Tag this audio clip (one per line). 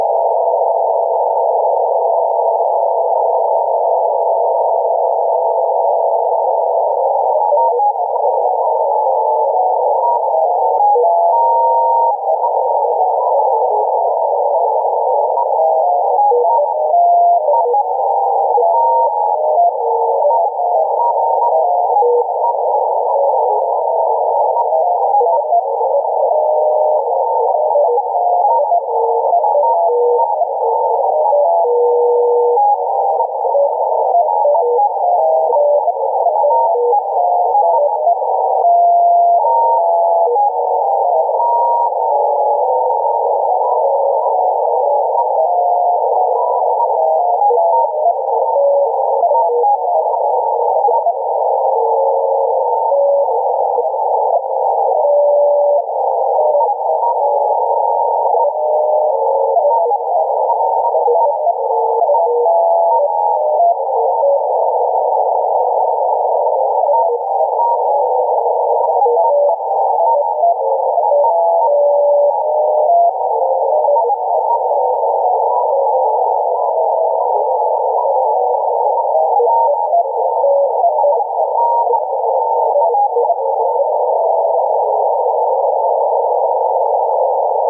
beeps
cw
data
electric
fake
ham
noise
radio
random
sdr
signal